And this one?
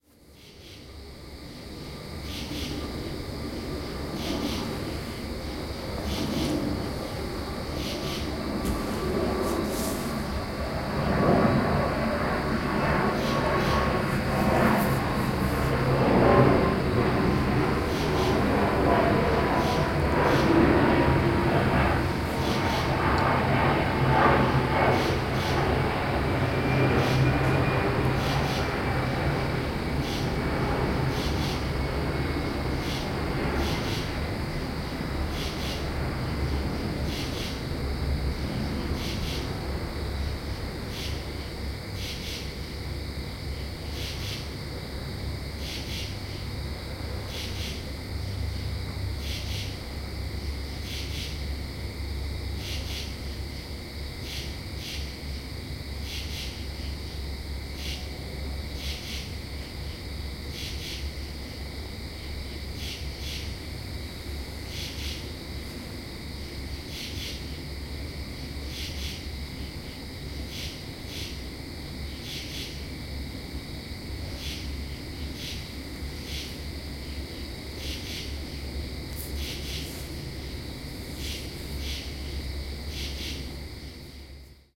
October night, cicadas, crickets, jet flyover
Residential night, cicadas, crickets, jet flyover.